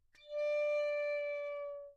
Piccolo - D5 - bad-pitch
Part of the Good-sounds dataset of monophonic instrumental sounds.
instrument::piccolo
note::D
octave::5
midi note::62
good-sounds-id::8329
Intentionally played as an example of bad-pitch